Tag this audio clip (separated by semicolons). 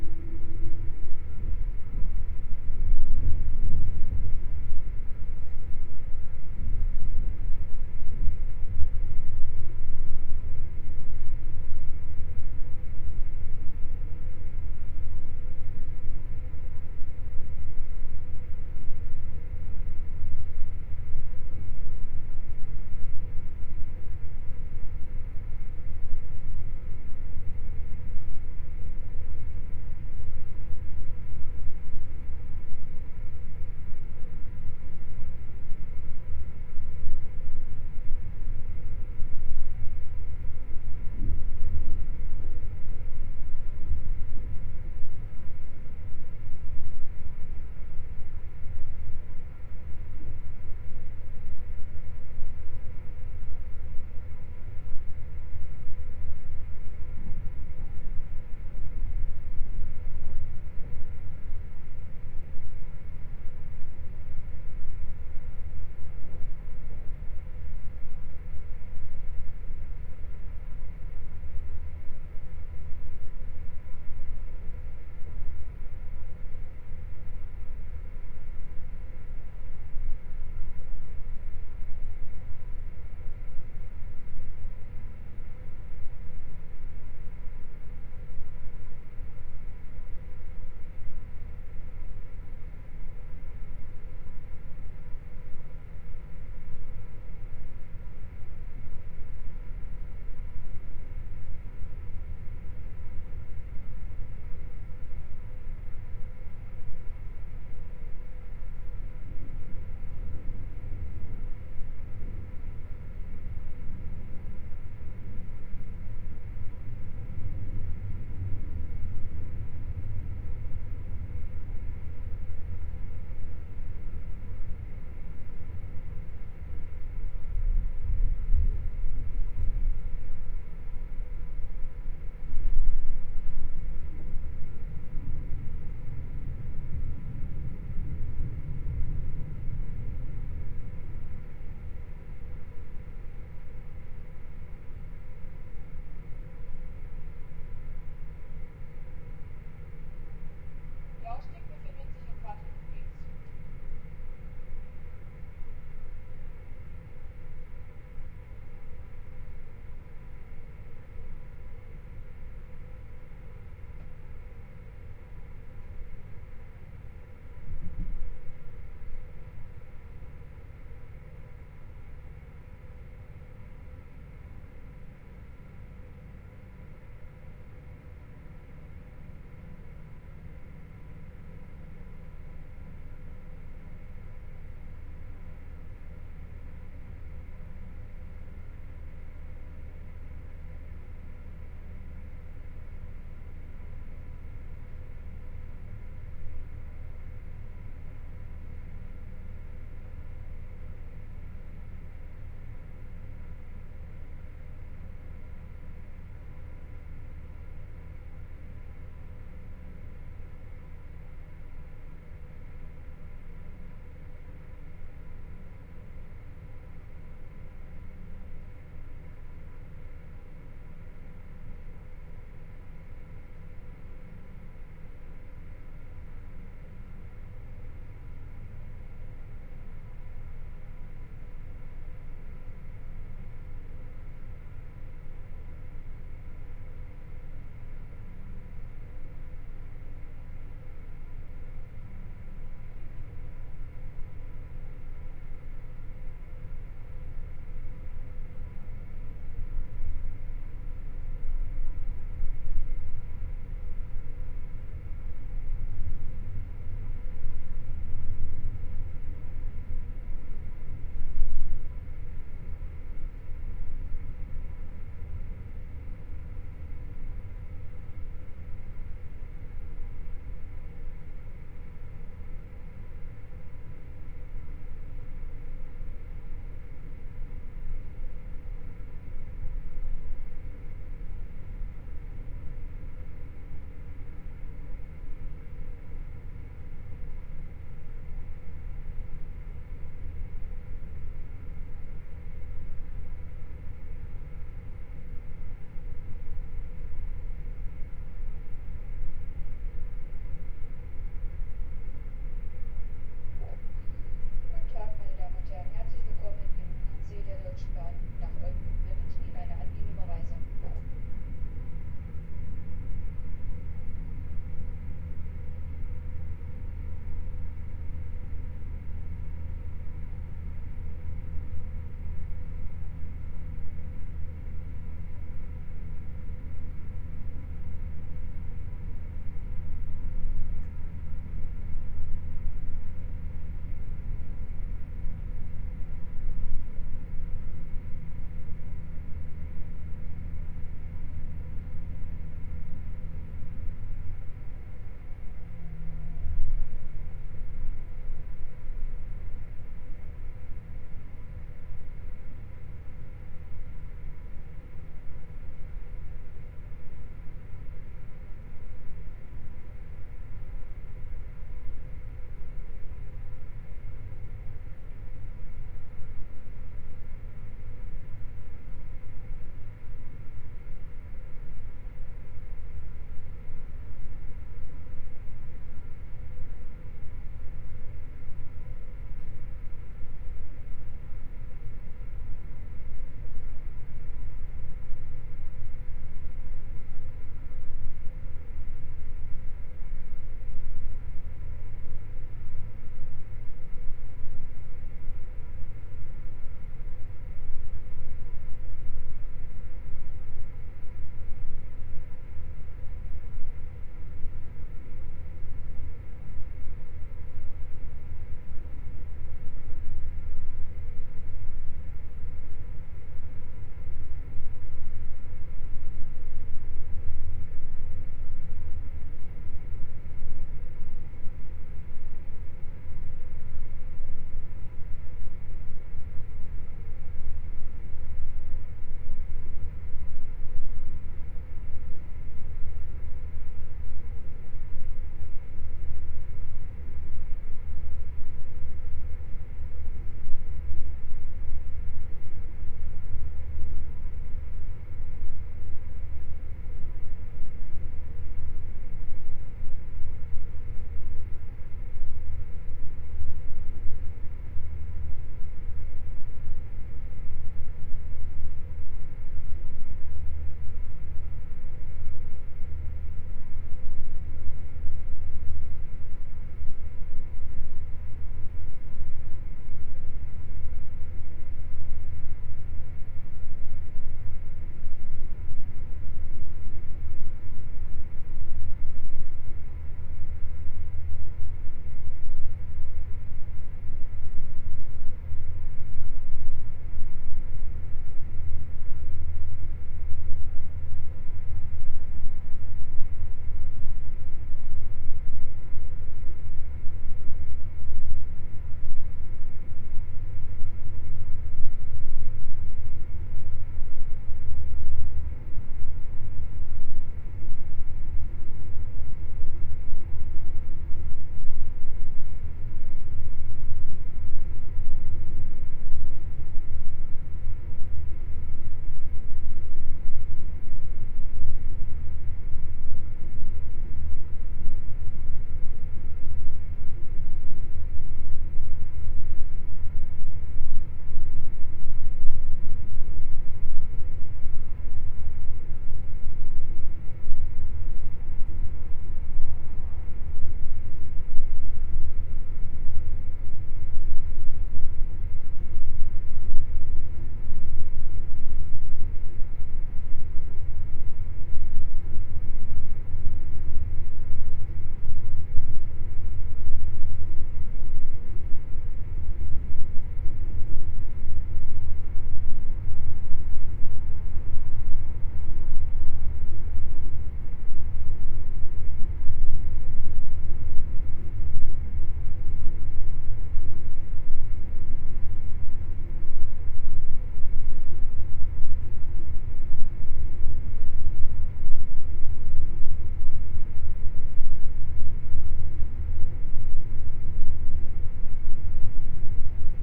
field-recording; ic; intercity-train; railway; train; traincompartment